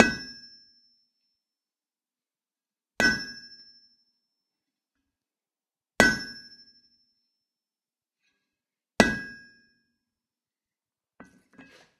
Anvil - Lokomo A 100 kg - Forging extremely hot steel 4 times
Forging white glow hot steel on a Lokomo A 100 kg anvil four times with a hammer.
4bar, 80bpm, anvil, blacksmith, crafts, extremely-hot, forging, hot-steel, impact, iron, labor, lokomo, loop, metallic, metal-on-metal, metalwork, smithy, steel, tools, white-glow, work